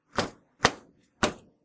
The door from an old coin-operated washing machine being opened. Series of instances.
mechanical, slam, door, dryer, washing-machine